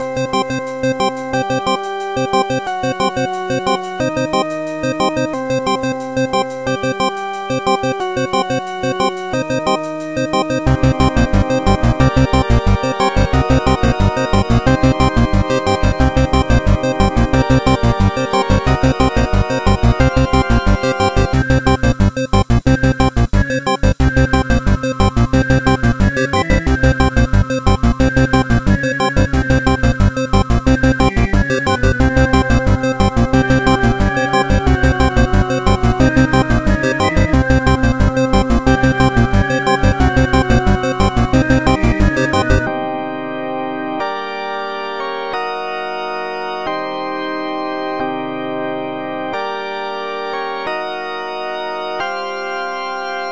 shit is real
disturbing but stupid loop with different parts.